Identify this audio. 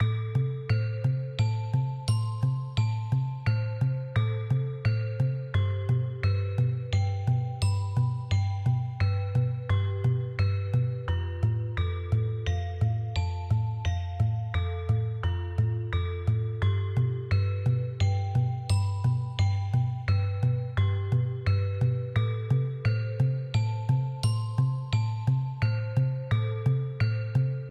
A Simple arpeggio to express memories of childhood.